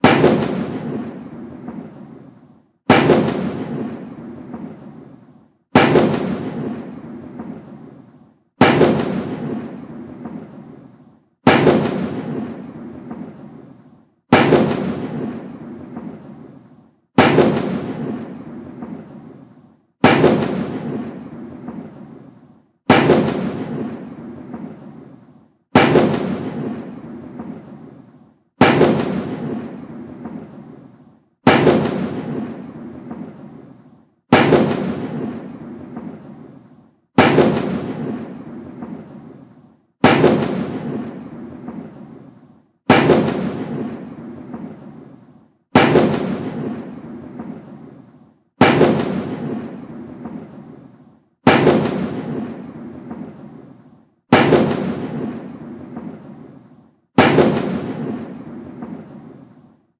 21 Gun Salute
1 Minute sample loop of a table slam (putting a cup on a steel table next to an iPod 5 microphone) and fireworks explosion field recording, Easter Saturday, Neutral Bay, Sydney, Australia.